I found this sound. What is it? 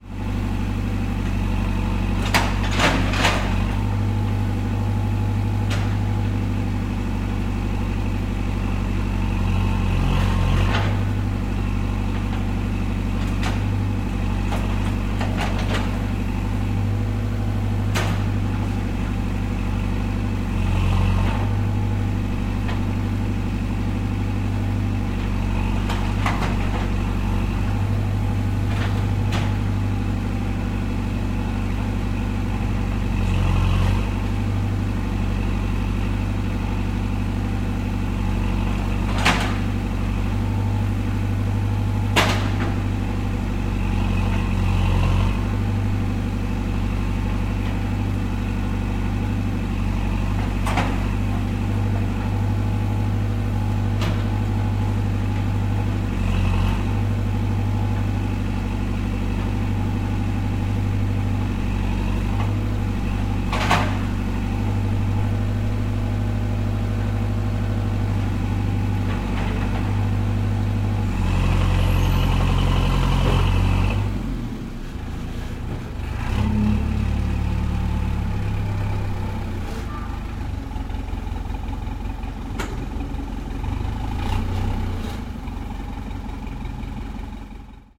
A bulldozer working on the street, recorded with MXL Cube Drum microphone -> Focusrite 2i4.